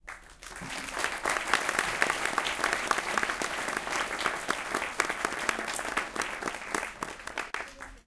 applause 8sec
Audience of about 150 people applauding in a cinema. Recorded on an Edirol R-09 with built-in mics.
people, applause, audience, theater